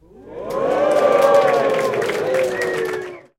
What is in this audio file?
Crowd cheering at Talk & Play event in Berlin.
Thank you and enjoy the sound!